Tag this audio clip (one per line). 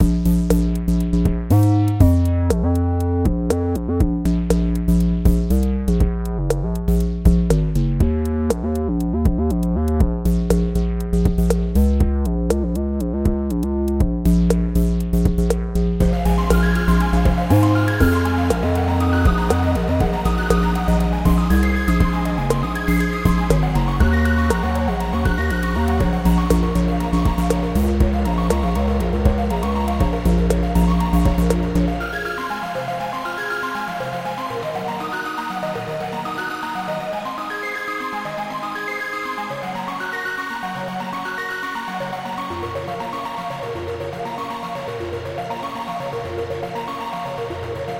120bpm
Bb
loop
minor
music
reasonCompact